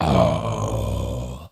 Tibetan Zombie Monk
Throat-singing like zombie growl
monk, moan, growl, undead, zombie, creepy, moaning, singing, throat, horror